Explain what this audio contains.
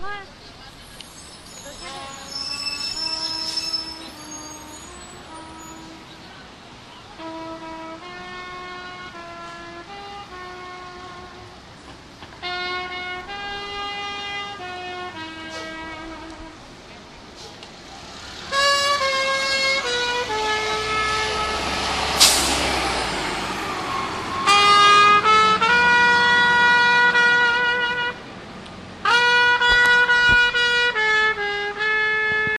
A man plays trumpet on the corner of 6th and Jefferson recorded with DS-40 as the left microphone mysteriously stopped working and salvaged as a monophonic recording in Wavosaur.